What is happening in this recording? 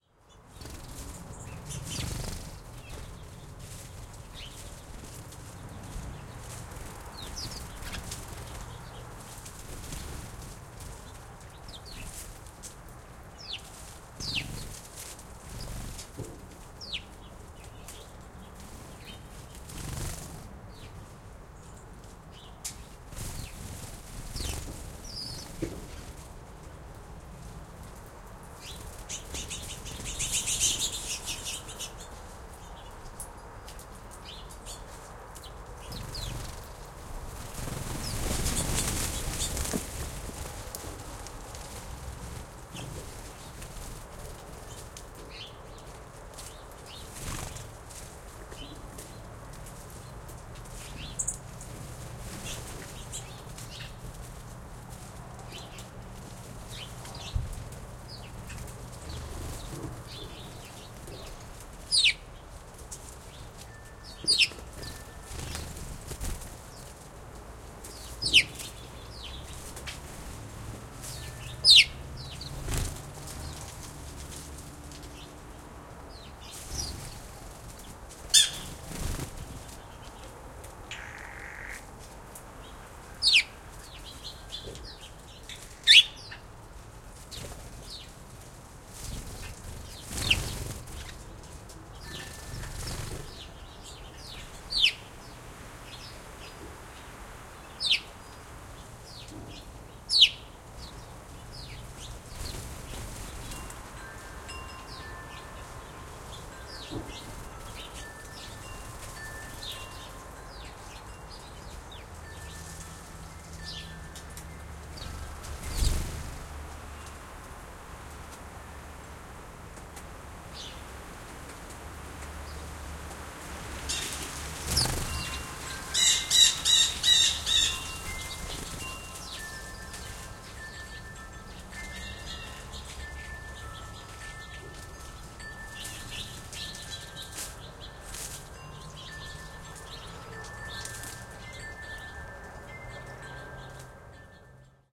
Wing flaps flying many bird feeder sparrows blue jays NOTL 181224
Winter birds at bird feeder, flying eating, calls, wide stereo spaced EM172s. Niagara-on-the-Lake.
wing, flying